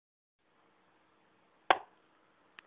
dotting an i on a chalkboard
chalk, dot, writing